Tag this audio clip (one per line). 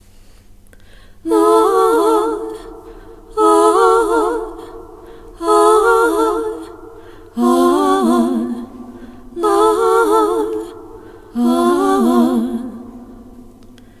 female-vocal
singing
bouncy
quaint
voice
acappella
woman
jaunty